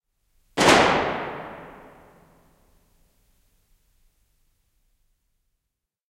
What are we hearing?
Pistooli, kaikuva laukaus talossa.
Paikka/Place: Suomi / Finland
Aika/Date: 1976